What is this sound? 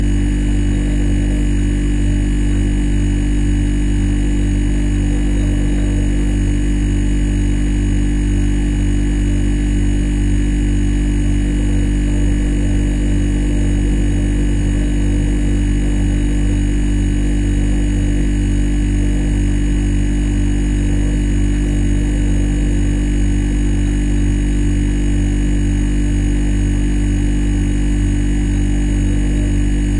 Combined fridge/freezer. Recorded from the side near the floor. You can hear the compressor motor and the refrigerant gently boiling.
freezer, refrigerator, motor, household, compressor, fridge, hum, buzz, kitchen